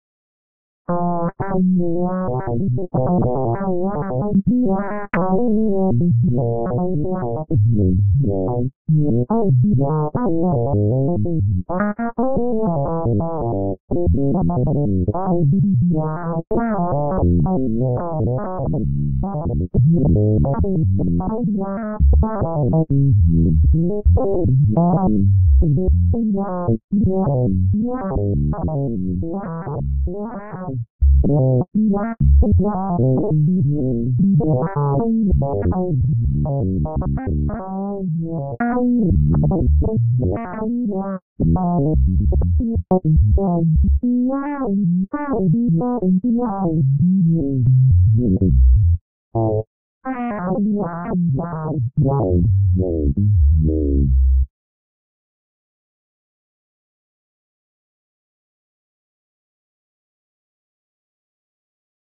Computer Malfunction
This is a recording of me smacking keys like a mad man, while shifting the pitch and mod wheel of a midi keyboard. Enjoy.